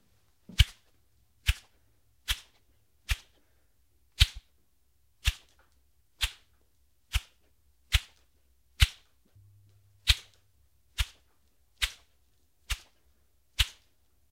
Close Combat Thin Stick Whistle Whiz Whoosh through Air
Thin fibreglass stick whistling through the air.